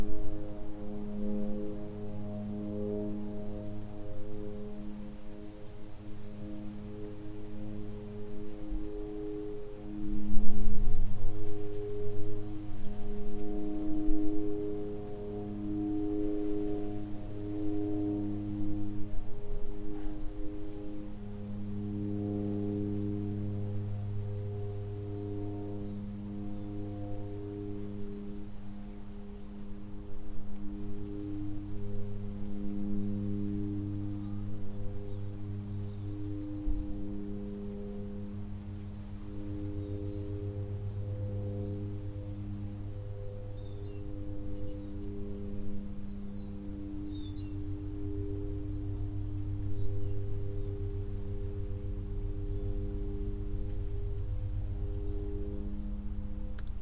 Neighborhood ambiance with distant plane in the sky. Light wind, small birds, minor vehicle noises.